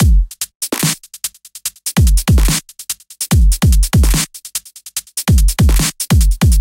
Some nasty wobble basses I've made myself. So thanks and enjoy!